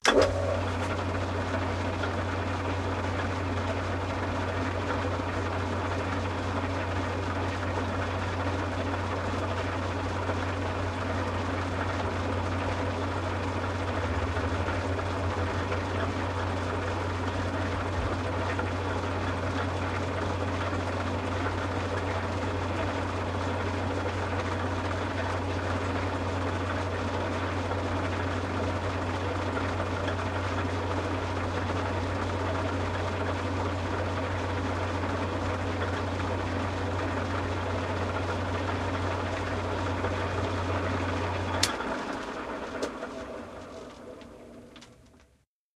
lathe atlas 6inch idling
This is my Dad's old lathe. He thinks it is from around the late 1940's with lots of exposed belt drives and pullies. Hence the interesting sounds it makes. this recording it is just switched on , left to rotate and then switched off - no metal cutting. Recorded on ipod touch 3G wtih blue mikey microphone and FiRe app.
atlas, continuum-4, idling, lathe, machine, sound-museum